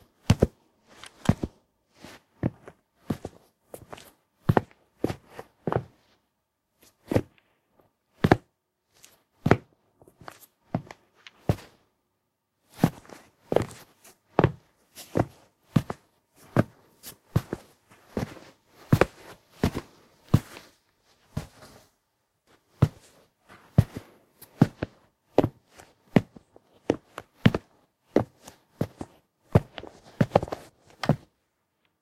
Realistic Footsteps
heres some generic footstep sounds i recorded, enjoy guys
recorded with Sony HDR PJ260V then edited using Audacity
steps, step, floor, field-recording, walk, footstep, ground, feet, walking, shoe, shoes, footsteps, sound, foley, foot